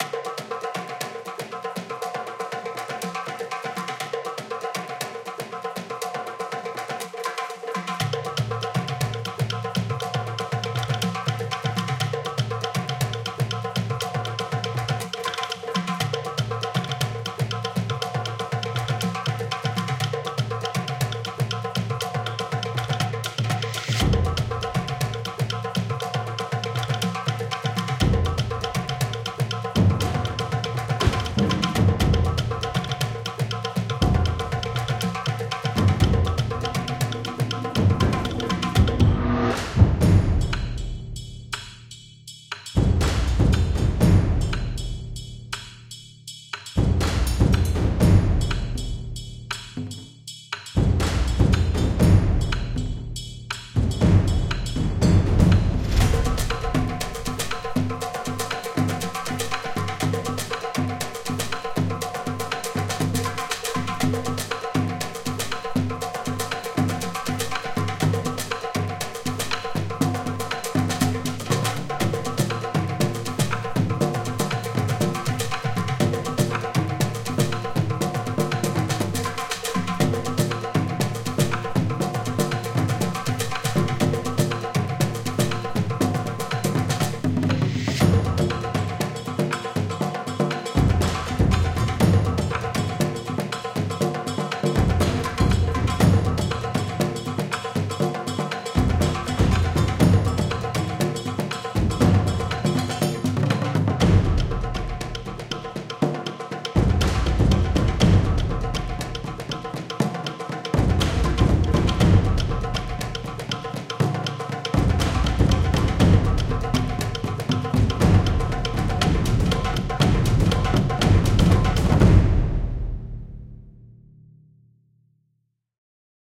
Action Percussion
A driving percussion underscore with dozens of drums (and other percussive instruments) of varying sizes in high quality. Who needs strings or winds or synths when you've got this many drums??
This would be great in an action or chase scene or battle, or for anything that needs to be amped up to 11. Driving percussion, polyrhythms, and drums across the frequency spectrum are sure to raise your blood pressure and call you to action!
120-bpm, action, beat, chase, cinematic, dramatic, driving, drum, drums, ensemble, epic, ethnic, intense, movie, percussion, percussive, polyrhythm, pursuit, rhythm, rhythmic